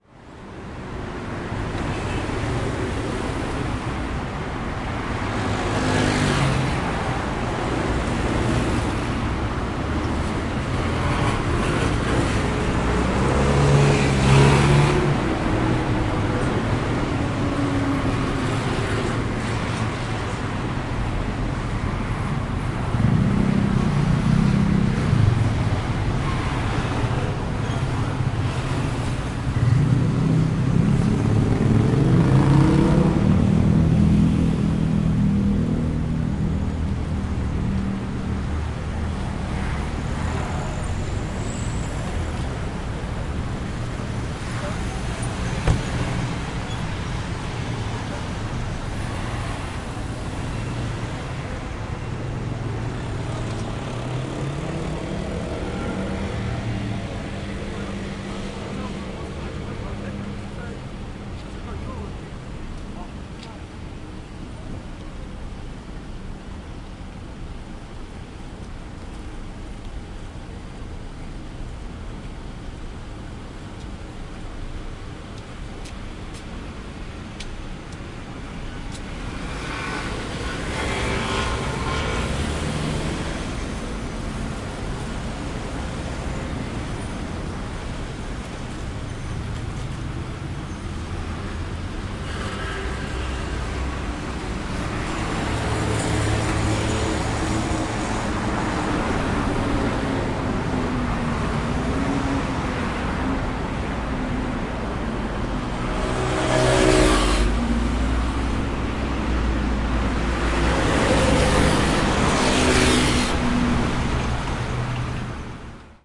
0331 Traffic Sangwangsimni 2
Low traffic in a road in Sangwangsimni. Motorbike engine. People walking.
20120629
field-recording, korea, traffic, engine, motorbike, seoul